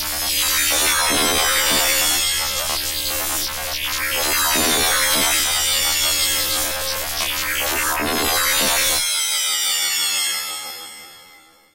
ELECTRONIC BLEND HI PITCH
This is a blend of sounds of electric static space like or erie for sci fi space
buzz, electronic, electronics, erie, fi, sci, space, static